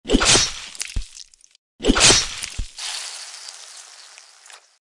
Decapitation (softer head impact)
A lot of effort and time goes into making these sounds.
This evolved out of a decapitation sound effect I created for a game. For this one, there's less bass frequency and loudness when the decapitated head hits the ground.
I've credited you all below this paragraph:
- Beheading SFX by Ajexk
- Blood Gush / Spray by cliftonmcarlson
axe-chop, beheading, beheading-sound, chop, decapitation-fx, decapitation-sound, decapitation-sound-fx, execution, executioners-axe, guillotine